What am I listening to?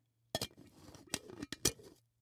Lid On Martini Shaker FF297
Twisting lid onto martini shaker, lid hits first then twists
shaker, lid, martini, Twisting